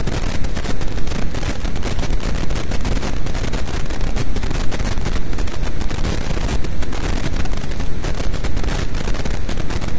brown noise fm distortion2
Brown noise generated with Cool Edit 96. Distortion effect applied. Stereo.
brown, distortion, noise, static, stereo